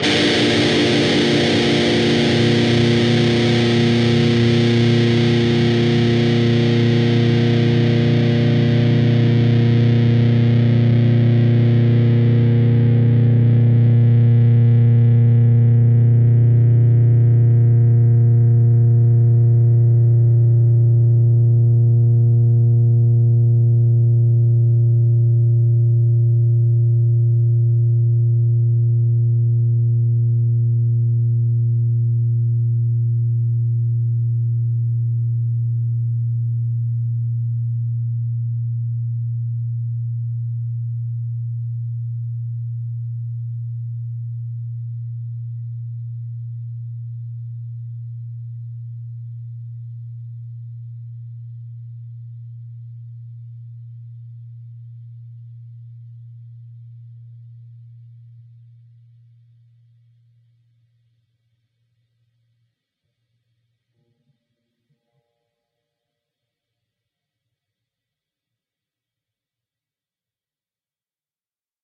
Dist Chr Gmin up
E (6th) string 3rd fret, A (5th) string 1st fret, and D (4th) string, open. Up strum.
chords, rhythm-guitar, distorted-guitar, guitar-chords, distortion, rhythm, distorted